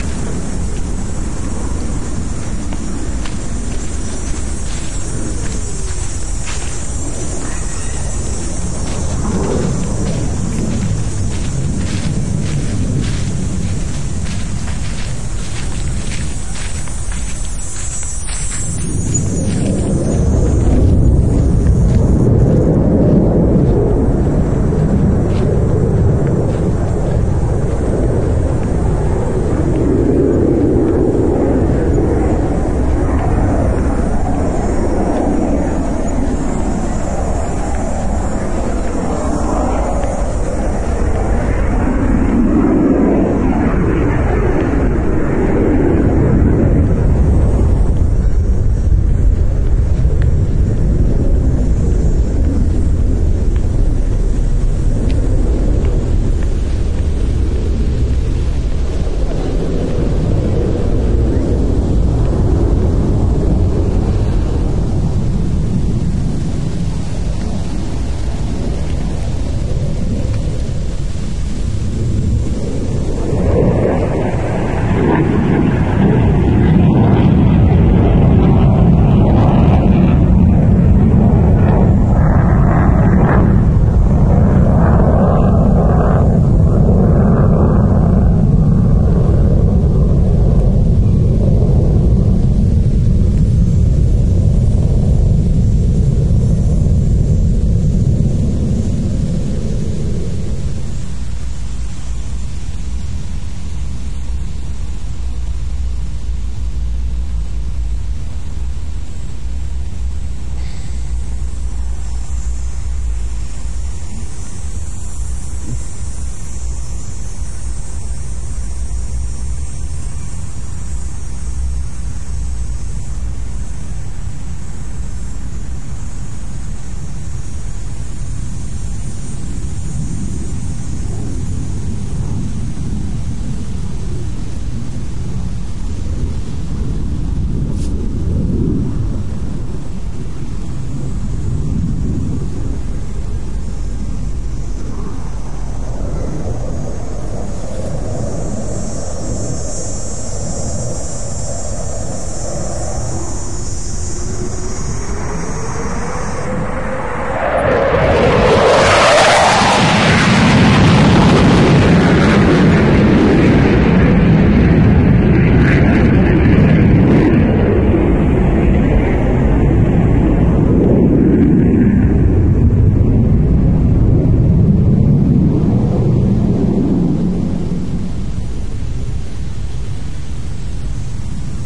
Airshow w: Cicadas Hot Day
binaural, airshow, cicadas, day, hot, w, toronto